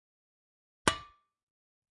Hitting Metal 09
metal, dispose, hit, metallic, iron